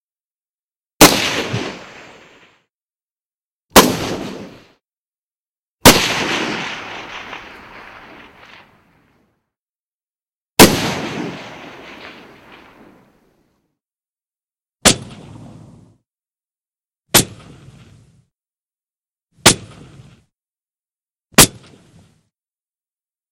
Sniper rifle shot sounds.